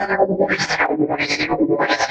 This recording started out as a human voice, but has had many effects
applied to it, including a "tremolo" (What Audacity calls it, more like
a dip in volume 4 times a sec), reverse, and possibly phaser. It sounds
like a chopped up glissando, sliding first down, then back up (pitch wise).
noise, weird, processed, voice